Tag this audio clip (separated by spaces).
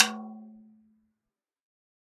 tom drum 1-shot multisample velocity